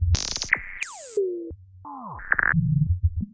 bc8philter18
various bleeps, bloops, and crackles created with the chimera bc8 mini synth filtered through an alesis philtre